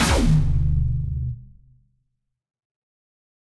laser blast 3
A little fun in Vitalium + LMMS